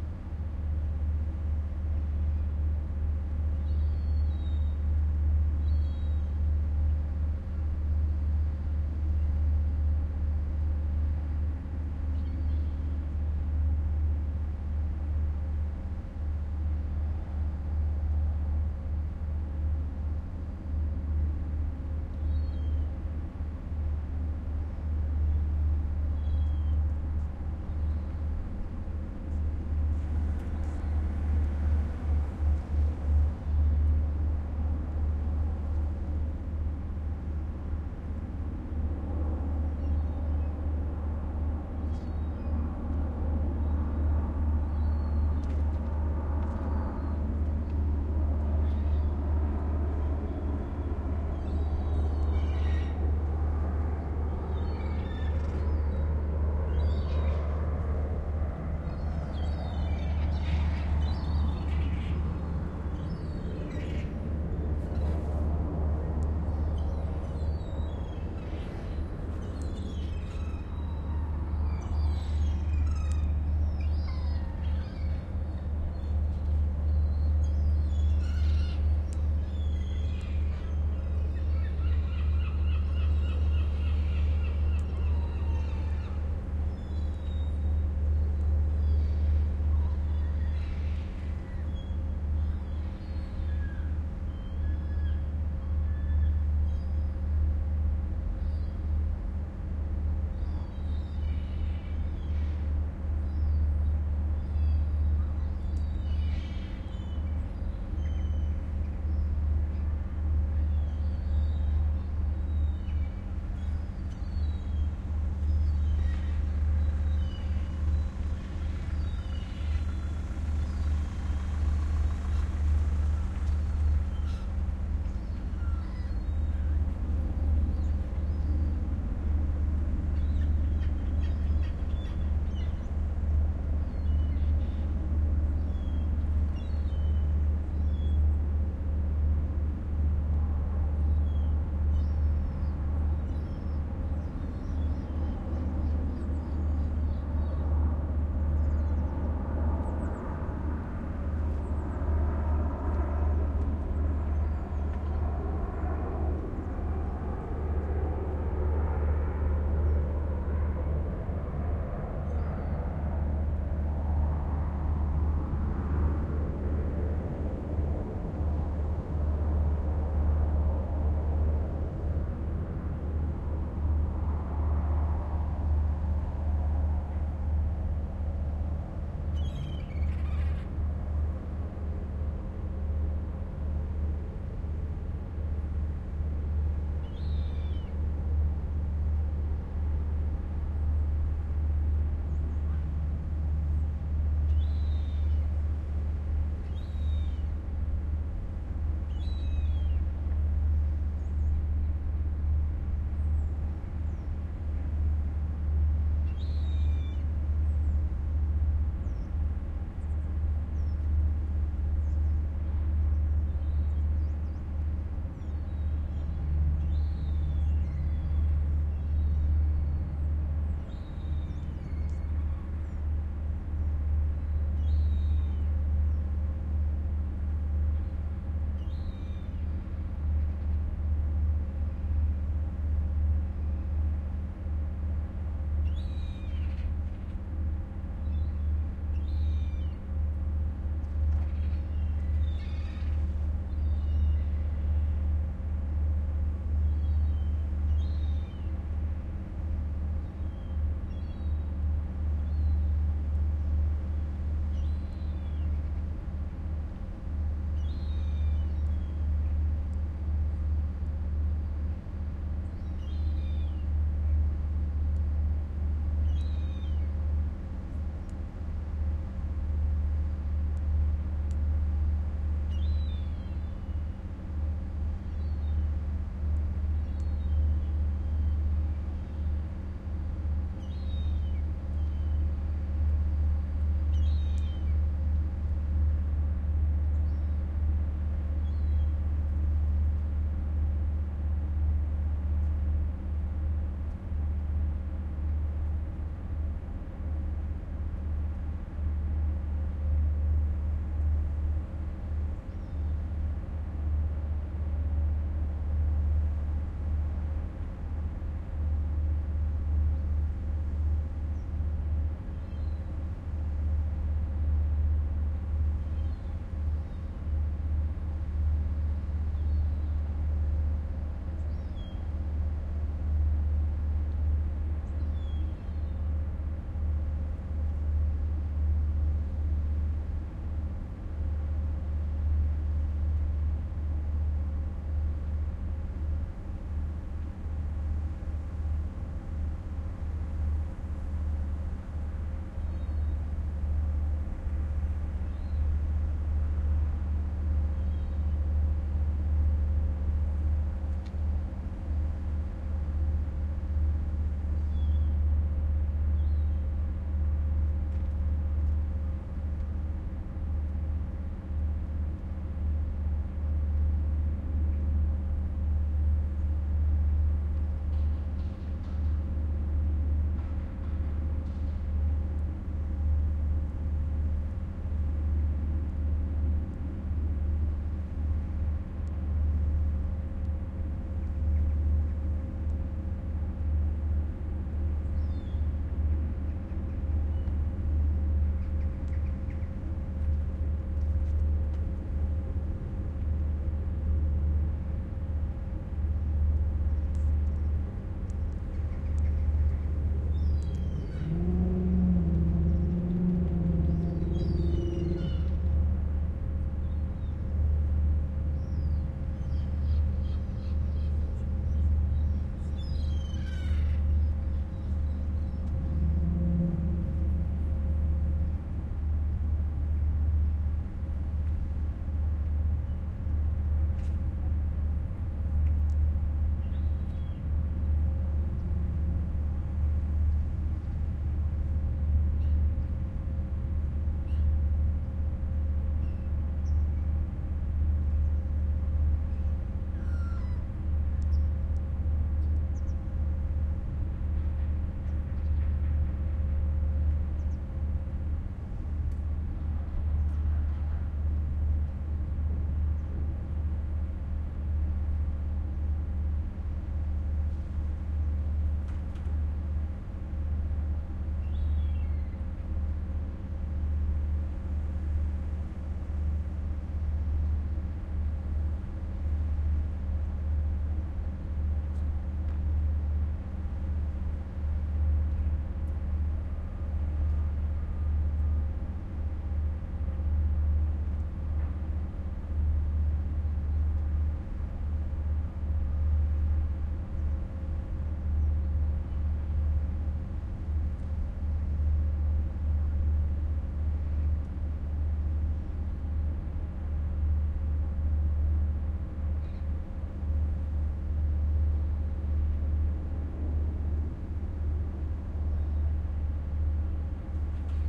Yes, another recording of harbour sounds. Again Ijmuiden in the Netherlands, again at the same spot (because of the seagulls) and again very atmospheric and totally different from the recordings I did at the same spot. Shure WL 183 microphones, a FEL preamp into an iriver ihp-120. October 2008.